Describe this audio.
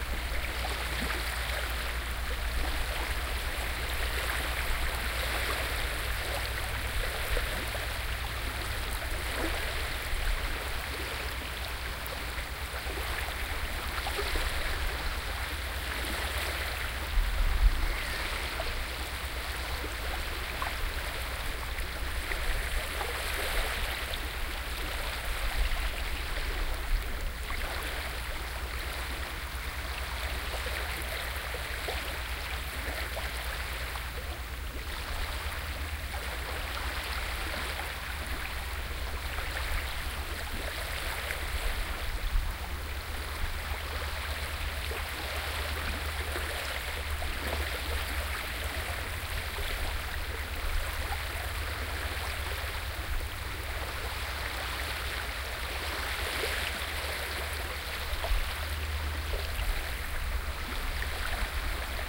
Waves near Hjerting in Denmark. The recording was done with the Soundman OKM microphones and an iriver H320 recorder. Sorry, that it is only short, but it became too windy.
binaural, denmark, field-recording, hobugt, ocean, waves